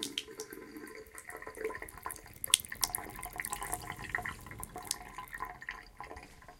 Crackle and water in drain